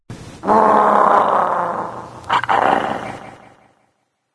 Cthulhu roars with a slight reverb at the end.